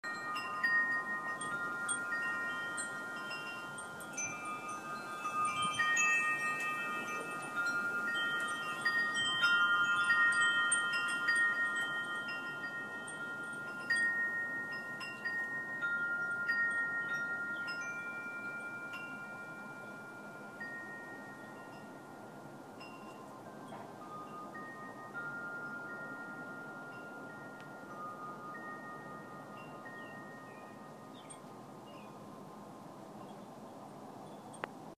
7-11-14 Wind Chimes
Late summer day breeze blowing through redwood trees with birds and wind chimes.
California, Chimes, Day, Trees, Wind, Windy